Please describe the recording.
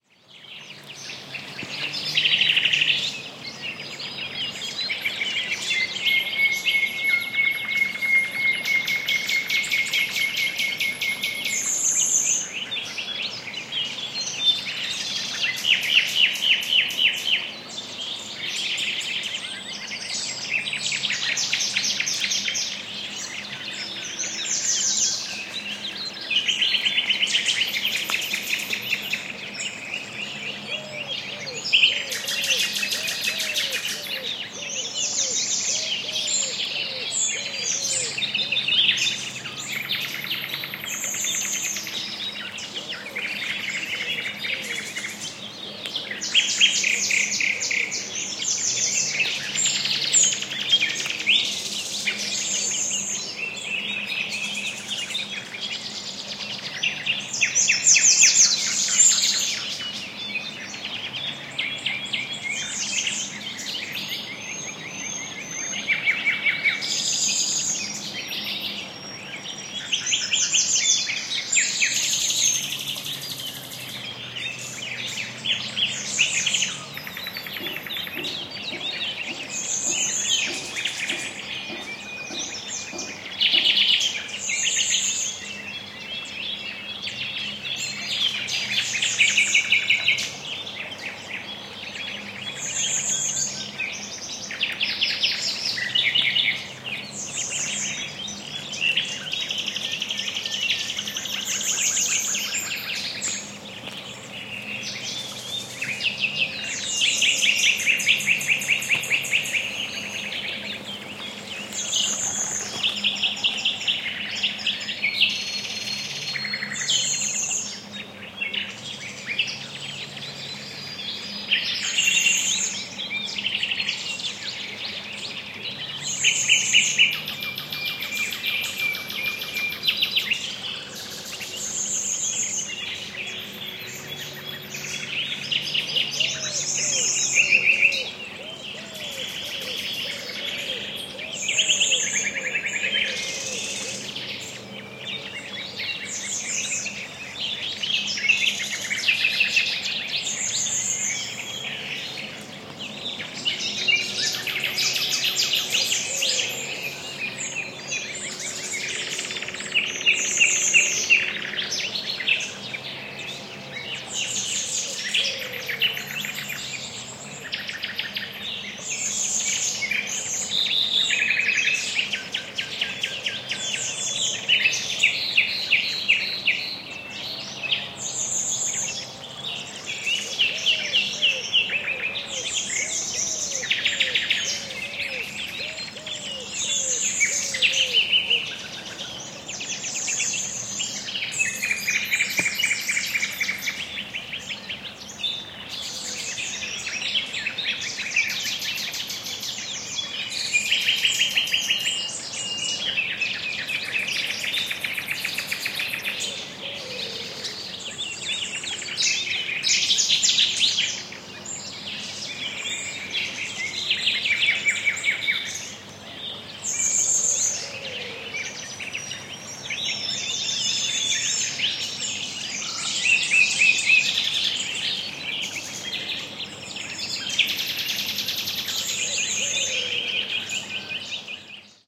20190416.riverbank.nightingales
Male Nightingale chorus singing like crazy in the morning near a small river. This would have been a good recording, unfortunately I just noticed the roar of vehicles on a distant motorway is also heard. Audiotechnica BP4025 inside windscreen and into SD MixPre-3 recorder. Recorded in the riverbanks of the Guadiato River near Espiel, on the Sierra Morena (Cordoba, S Spain) .
south-spain, bird, nature, field-recording